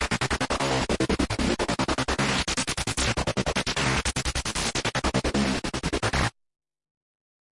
new order
i made it with analog gear (nord lead rack2), sequenced by live ableton.
acid, electro, loop, trance